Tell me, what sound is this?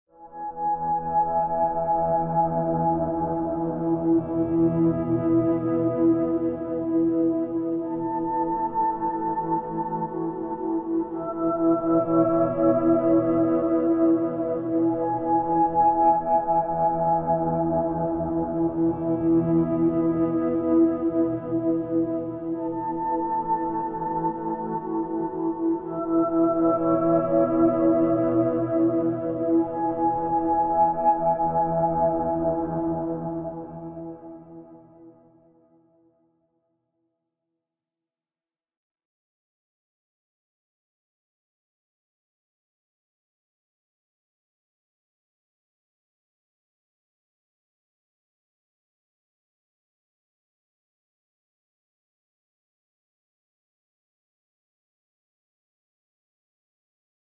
getting to the winery
ambiance, ambience, atmos, atmosphere, but, light, winery